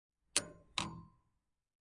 Sound recordings we did for Urban Arrow Electric Cargo Bike, some foley sounds